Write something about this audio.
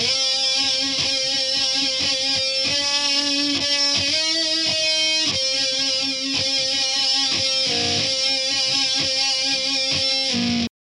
THESE ARE STEREO LOOPS THEY COME IN TWO AND THREE PARTS A B C SO LISTEN TO THEM TOGETHER AND YOU MAKE THE CHOICE WEATHER YOU WANT TO USE THEM OR NOT PEACE OUT THE REV.
groove, guitar, hardcore, heavy, loops, metal, rock, rythem, rythum, thrash